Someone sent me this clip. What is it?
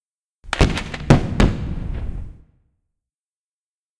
Explosive 1 v1 [DOD 130303]
army, artillery, attacking, bang, counter-strike, damage, destruction, destructive, explosion, explosive, gun, guns, kaboom, military, shot, tank, tnt, weapon